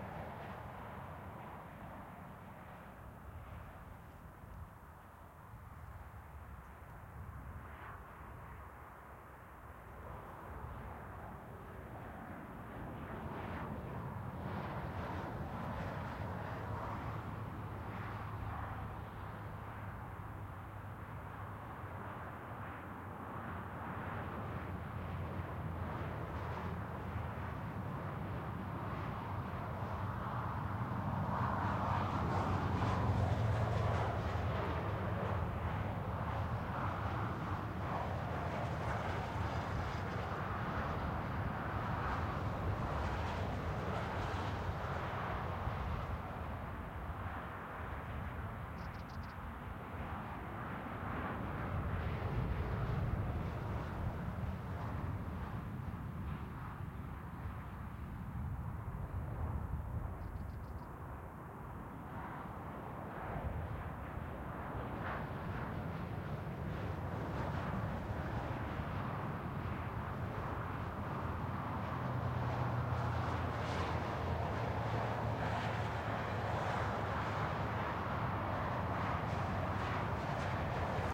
Highway MS Recording near Tabernas desert, Almeria